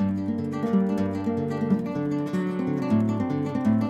Flamenco Loop 4
acoustic-guitar, flamenco, guitar, loop, nylon-string, pluck, plucked, stereo, strum